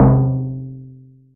fm7timp
Synthesizer drumkit produced in Native Instruments FM7 software.
electronic, fm, fm7, timpani, nativeinstruments